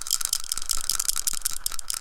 Percussion kit and loops made with various baby toys recorded with 3 different condenser microphones and edited in Wavosaur.
kit, loop, percussion, rattle, toy